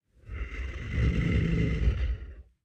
Bear growl, emulated using human voice and vocal transformer